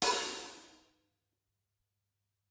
Hi Hat V4
My own drum recording samples. Recorded in a professional studio environment
Crash, Hi-Hat, Drum-kit